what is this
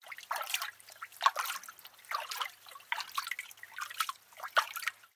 Foley, Street, Water, Washing, Plastic Drum
Street, Brighs, Knife, Water, Countryside, Close, Metal, Free, Plasticdrum